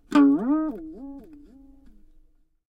toy guitar elastic 14
A pack of some funny sounds I got with an old toy guitar that I found in the office :) Hope this is useful for someone.
Gear: toy guitar, Behringer B1, cheap stand, Presonus TubePRE, M-Audio Audiophile delta 2496.
cartoon, guitar, string, toy, toy-guitar